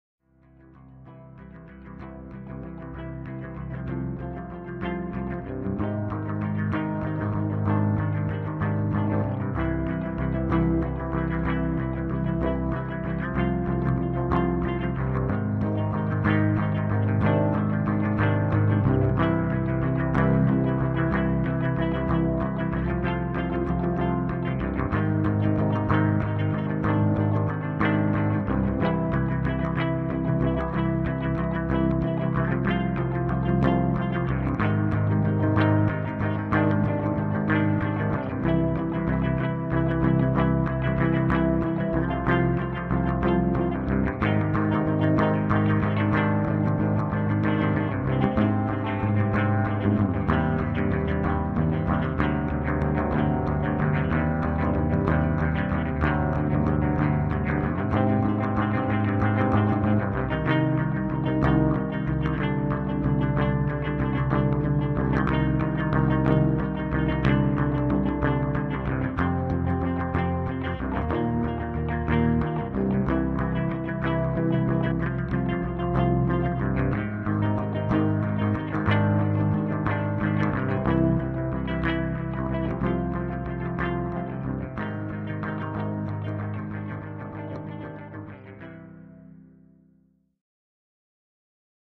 Happy Guitar
Some guitar stuff